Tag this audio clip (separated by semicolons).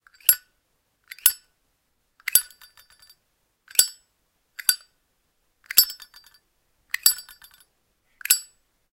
lid; lighter; metal; open; zippo